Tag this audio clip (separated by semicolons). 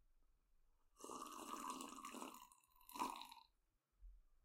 cup; development; drink; drinking; field-recording; game; games; gaming; sip; sipping